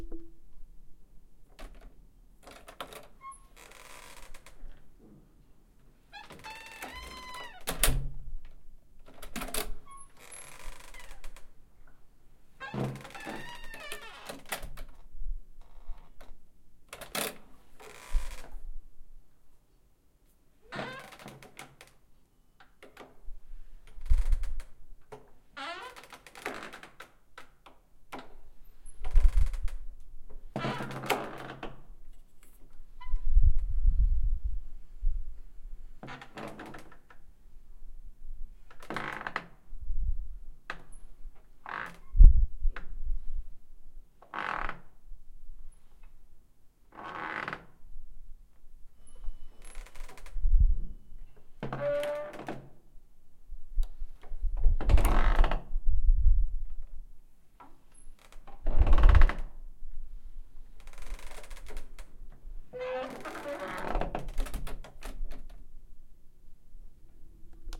a creaky door opening and closing several times.